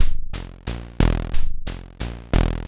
Monopoly 90bpm robotic sequence